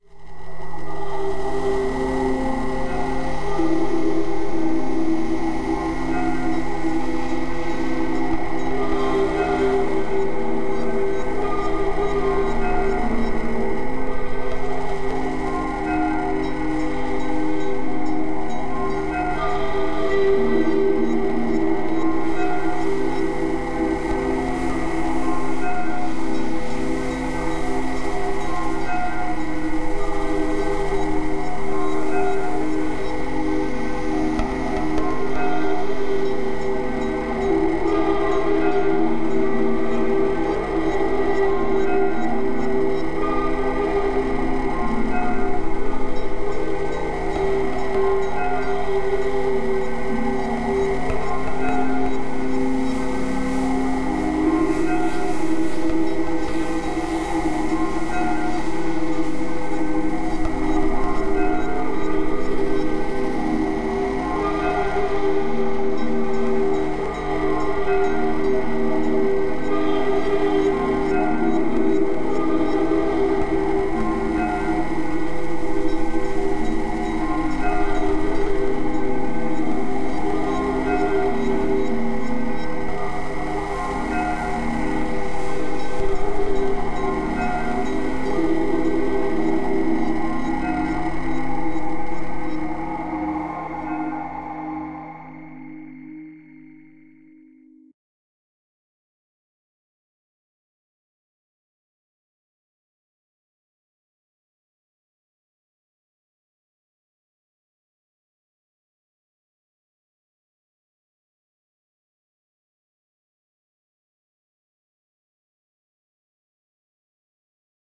SoundScape One - Mystère
haunted, illbient, thriller